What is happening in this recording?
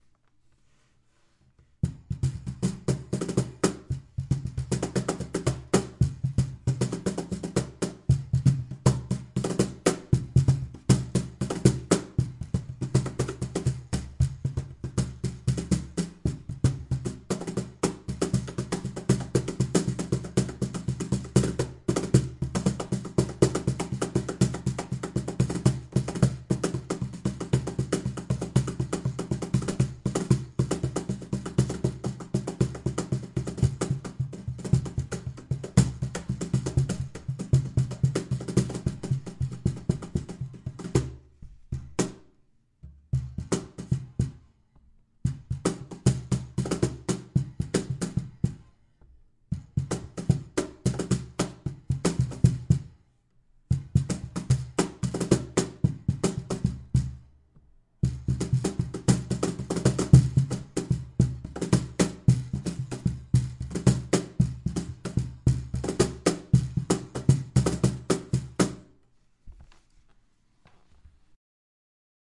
cajon ramble
Messing around with the cajon, recorded with a single condenser mic.